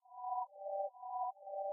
siren-02-feeling
I made it just because I made it... A little smoother than the others, you could like it if you didn't enjoyed the others.
This one could be better if you edit it to your scenario, because I know, it's not really a siren... :)
This sound made with LMMS is good for short movies.
I hope you to enjoy this, if you need some variant I can make it for you, just ask me.
---------- TECHNICAL ----------
Common:
- Duration: 1 sec 718 ms
- MIME type: audio/vorbis
- Endianness: Little endian
Audio:
- Channel: stereo
siren, smooth